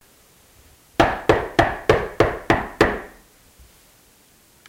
Banging on a door
Someone banging fervently/angrily on a door. Heard from an inside perspective, but can be effected to sound like the knock is coming from the other side of the door.